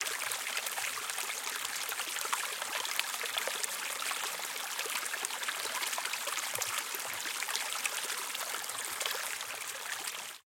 streamlet/stream
stream, streamlet